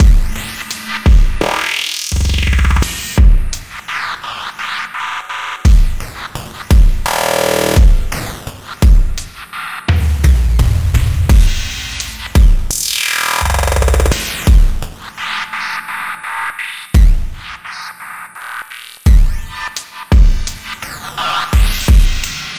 Glitch Drum loop 9e - 8 bars 85 bpm
Loop without tail so you can loop it and cut as much as you want.
drum, drum-loop, drums, electronic, glitch, groovy, loop, percussion, percussion-loop, rhythm